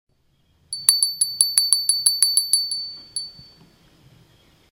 Large Glass Bell
A beautiful Glass Bell
Beautiful, Glass